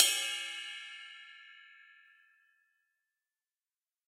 Multisampled 20 inch Istanbul Mehmet ride cymbal sampled using stereo PZM overhead mics. The bow and wash samples are meant to be layered to provide different velocity strokes.
acoustic, drums, stereo